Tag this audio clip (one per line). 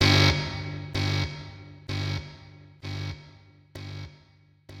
drums
filter
free
guitar
loops
sounds